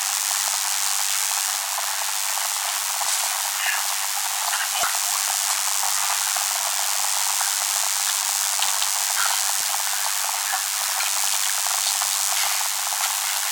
A broken guitar device connected to an audio circuit that makes a lot of noise.
harsh, noise, electronic, glitch